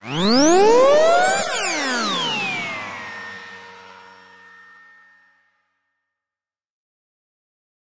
moon siren
fx, synth